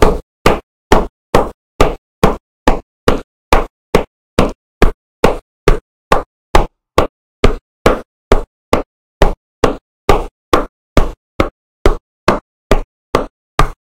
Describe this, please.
Stomping on wood
stomping on longboard on floor